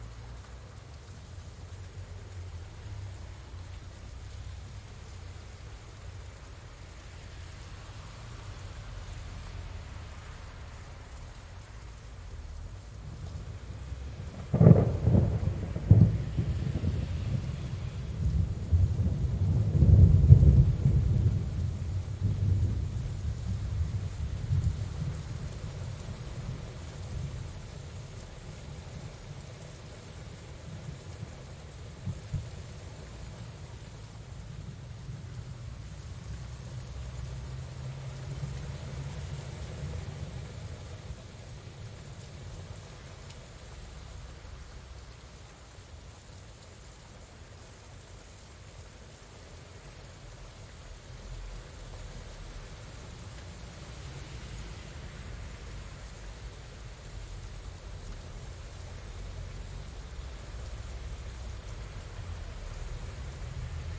Thunder Storm
A Mid-Side Recording of some Thunder going off, Mic (zoom h2n) pointed out of my window
field-recording, rolling-thunder